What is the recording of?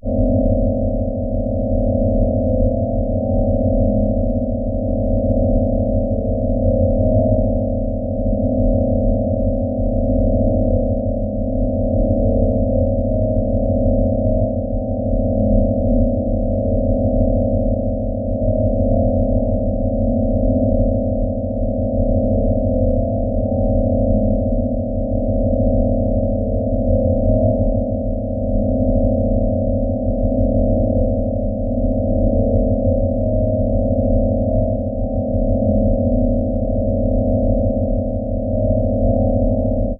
Created with an image synth program, these are modified images of brainwaves set to different pitch and tempo parameters. File name indicates brain wave type. Not for inducing synchronization techniques, just audio interpretations of the different states of consciousness.